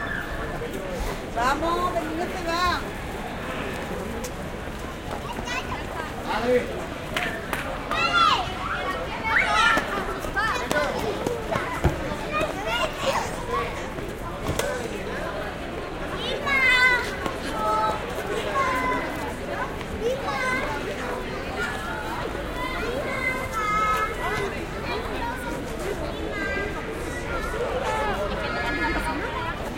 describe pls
20061222.christmas.ambiance.04
street ambiance during Christmas in Seville, Spain. Voices of people passing, happy kids play around
street, christmas, ambiance, winter, spain, binaural, city, field-recording, children